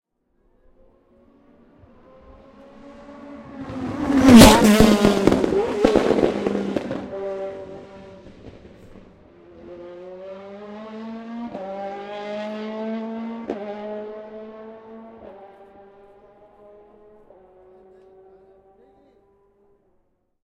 FiaGT.08.PotreroFunes.RedHotBrakes.13
A sudden approach of a high speed car braking hard at a chicane.
accelerating, ambience, car, engine, field-recording, noise, race, racing, revving, sound, zoomh4